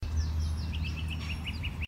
bird chirps short
Outside during late winter, heard beautiful birds chirping happily. Did my best to get a quintessential bird sound that could be used along side other ambiences or for transition or establishing shots.
bird-chirps, birds, birds-ambience, chirping-birds, little-birds, neighborhood, neighborhood-ambience, outside-ambience, outside-sounds, song-birds